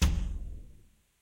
Palm strike on the side of an open wooden door.
Impact, Thud, Wood